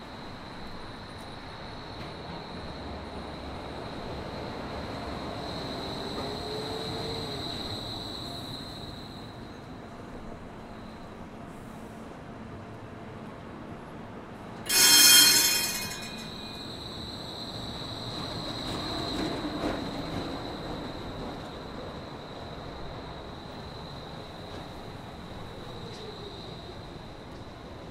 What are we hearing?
sh Seattle Trolley By
The sound of an electric streetcar (trolley) approaching, bell ringing, then driving off. Recorded in Seattle, South Lake Union business district. Sennheiser MKH-416 microphone, Sound Devices 442 mixer, Edirol R4-Pro hard disk recorder.
seattle, electric, streetcar, trolley